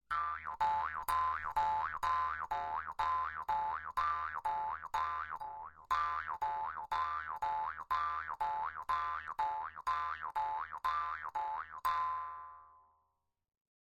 Jaws Harp- Short phrase
A short phrase of a jaws harp being played for a kind of western sound. Recorded with a behringer C2 pencil condenser into an m-audio projectmix i/o interface. Very little processing, just topped and tailed.
Jaws, silly, cartoon, cowboys, western, harp